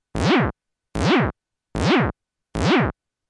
TD-3-TG zap x4
TD-3-TG recorded with ZOOM H-1. TD was performing slide through octaves with "slide" button pressed in program, and subsequent Cs were played, in octave -1 and 0, and higher C in octave 0 and +1, back and forth. Program takes 7 16th notes and the space between notes takes 9 rests. Tempo of the TD-3 was set to maximum and the volume control was set to high amount, to let ZOOM H-1 with REC level setting to 37, be driven up to -6dB. No amplify, no normalisation. "Tune" knob of TD-3 was set to maximum as well as "cut off", "envelope" and "accent". "Resonance" and "decay" was set to one o'clock. "Waveform" swicth was set to square. No distortion. ZOOM H-1 was plugged by a cable, TD-3 Output to Line In. Cable was named Vitalco - 1/8 Inch TRS to 1/4 TS, Male to Male, 3m.
303, TD-3, TD-3-TG, acid, bass, bounce, club, dance, drop, dub, dub-step, effect, electro, electronic, fx, glitch-hop, house, loop, rave, sound, synth, tb303, techno, trance